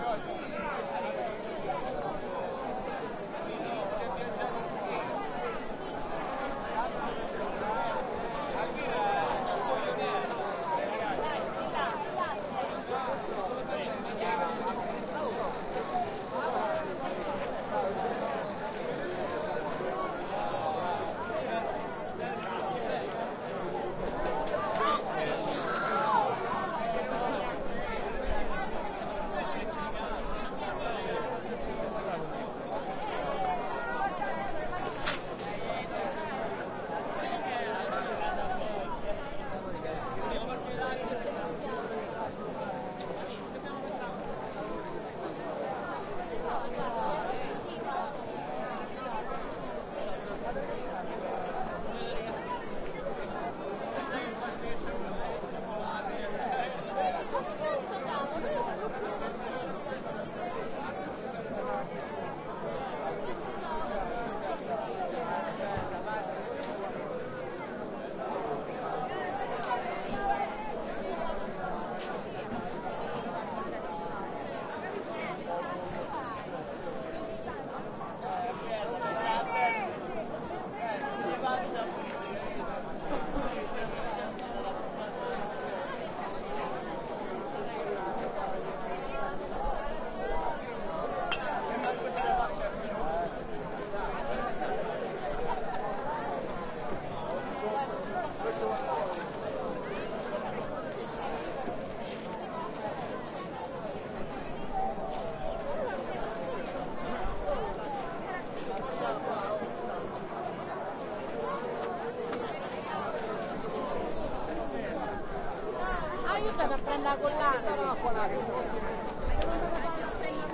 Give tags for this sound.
allumiere italy concert tolfa people

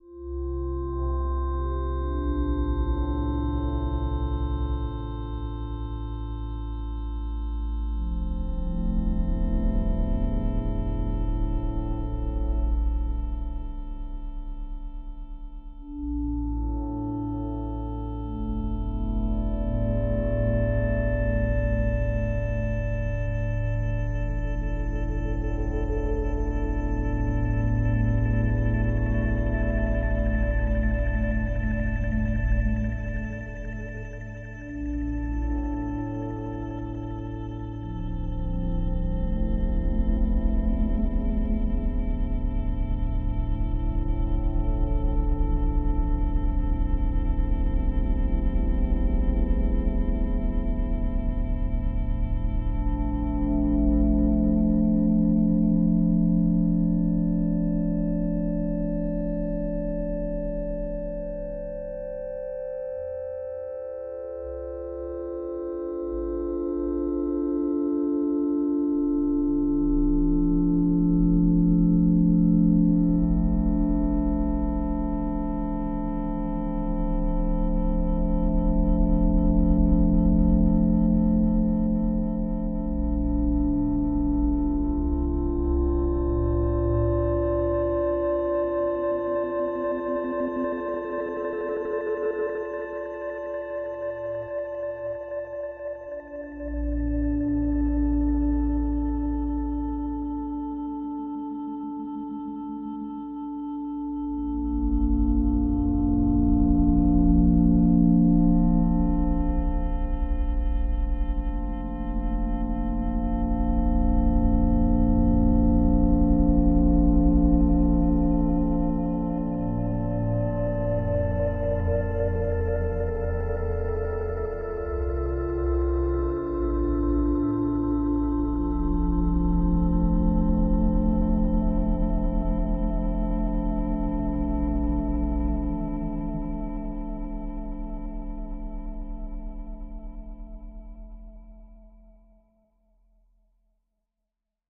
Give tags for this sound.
ambience atmosphere electro electronic music processed synth

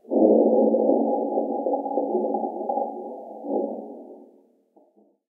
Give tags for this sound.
abstract contemporary-music marble rumble

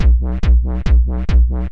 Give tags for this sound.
140bpm trance techno bass kick drum base dance

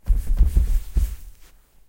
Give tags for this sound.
Japan japanese sit sitting tatami

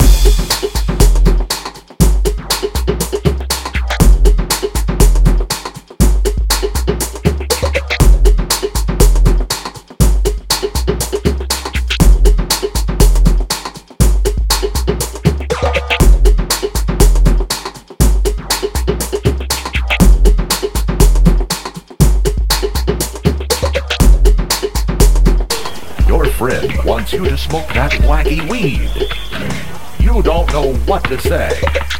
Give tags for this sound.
Beats Loops Mixes Samples Weed

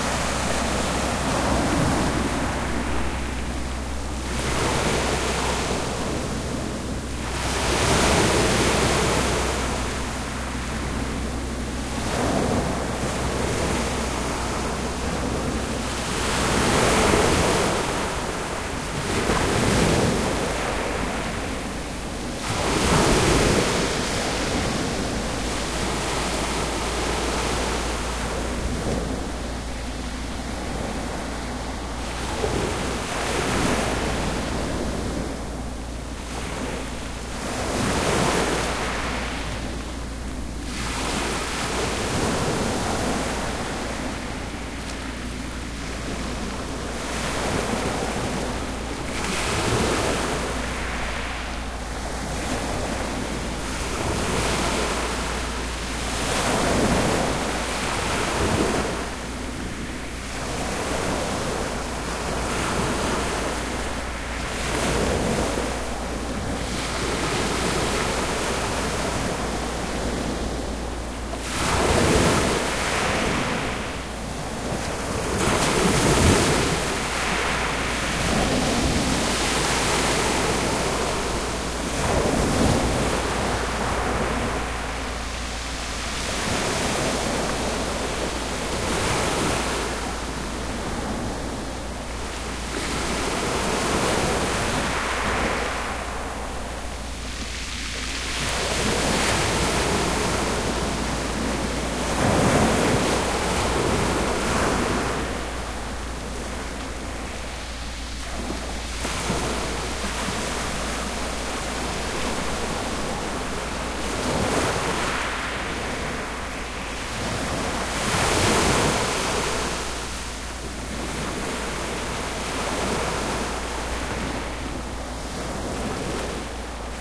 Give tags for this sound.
shore wave ocean sea sea-shore waves breaking-waves surf beech coast seaside seashore lapping beach

